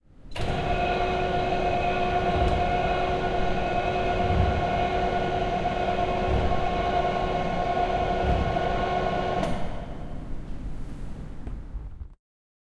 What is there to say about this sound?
STE-006 Classroom - Beamer Screen
Blinding down electrically the beamer screen of a classroom.